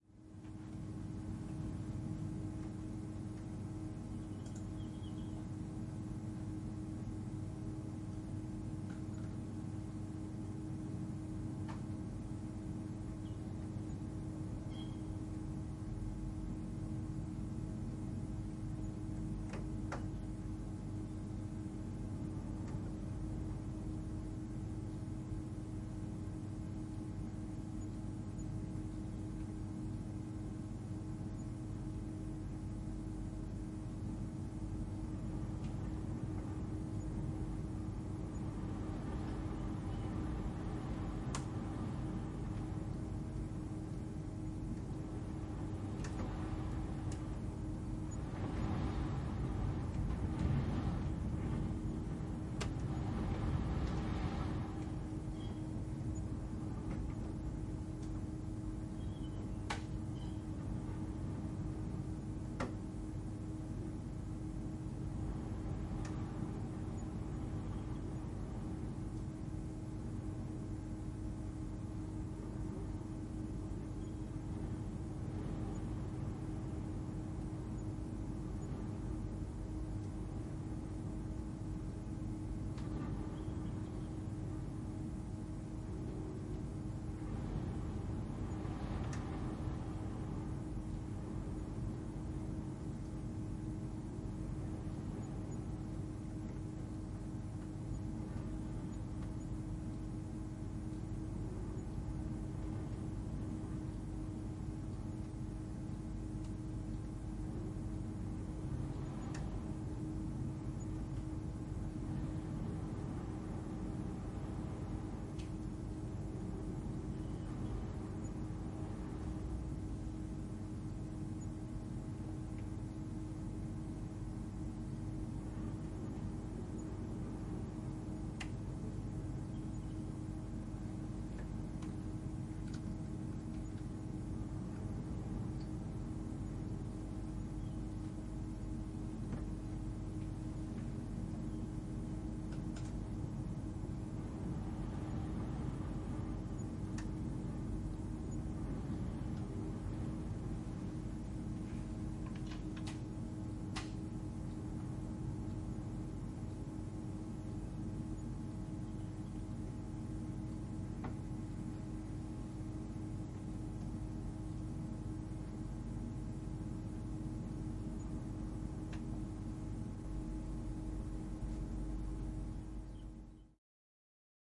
ATM INT OfficeLessCompsHighWindOutsideREJ ST F8MKH41670
2:54 field recording interior of an empty Lincolnshire office ambience on the third floor during high winds. Mics in the closed window.
Recorded with a stereo pair of (different make) mics and edited to remove intrusions. Filter at 90hz.
tbsound
ATMOS, building-structure-clunks, computer-hum-foreground, day, distant-exterior-world-birds-etc, EDITED, empty, Lincolnshire, mid-perspective, office, room, tone, window-closed, window-on-a-windy-day, wind-whistle